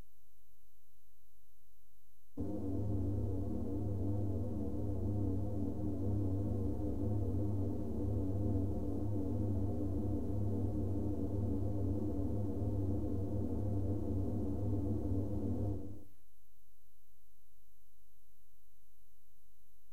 spaceship interior - avaruusaluksen s satila 1
spaceshi interior 1 - short, no beeps, made with clavia nordlead 2 and recorded with fostex vf16
imaginary, space, interior, scifi, ship, spaceship, synthetic